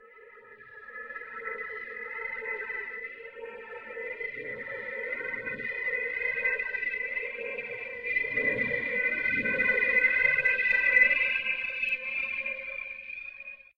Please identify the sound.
Creepy build up tone
Simple yet effective don't look over the shoulder moment.
ghostly, fear, scary, sinister, demon, fearful, hell, build-up, horror, spooky, haunted, Halloween, paranormal, Creepy